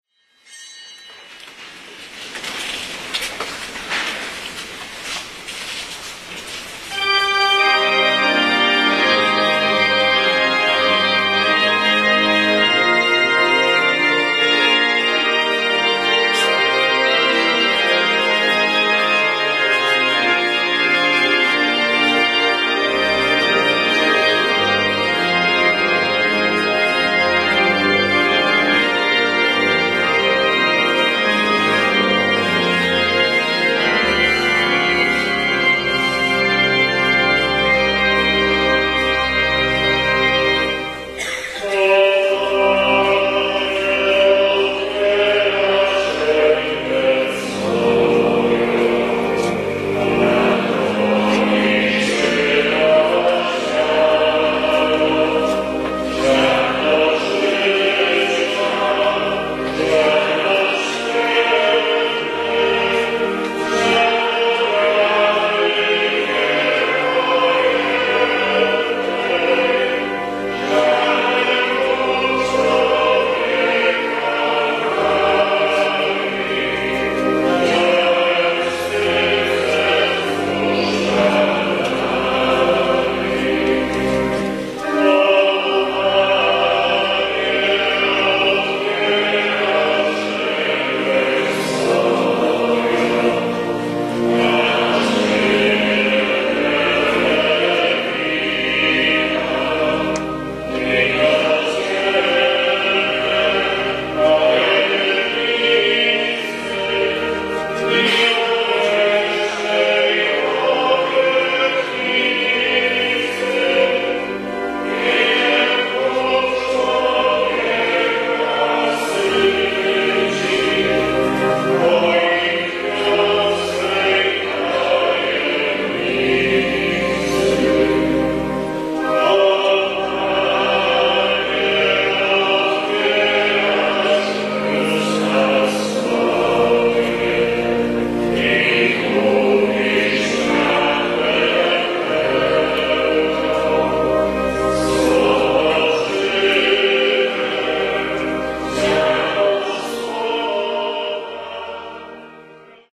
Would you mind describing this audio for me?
mass beginning030610
03.06.2010: the Corpus Christi mass and procession in Wilda - one of the smallest district of the city of Poznan in Poland. The mass was in Maryi Krolowej (Mary the Queen) Church near of Wilda Market. The procession was passing through Wierzbiecice, Zupanskiego, Górna Wilda streets. I was there because of my friend Paul who come from UK and he is amazingly interested in local versions of living in Poznan.
more on:
crowd, mass, singing, procession, poland, voices, wilda, poznan, field-recording, people, priest, steps, corpus-christi, church